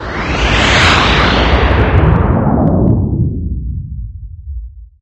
Insane sound created with coagula using original bitmap images.
ambient, insane, space, synth